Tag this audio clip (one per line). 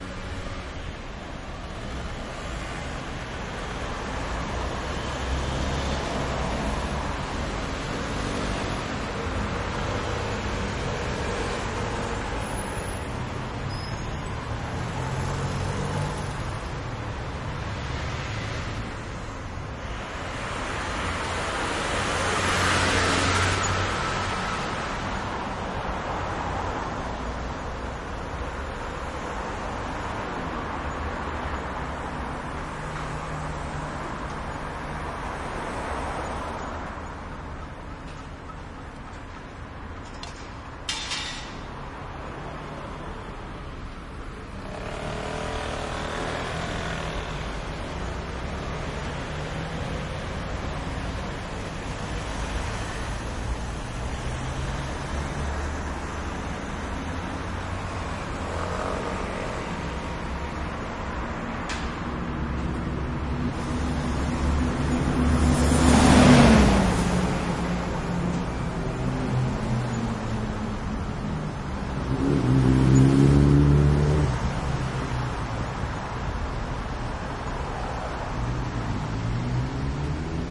street
people
city
ambience
town
cars
noise
traffic
Catalunya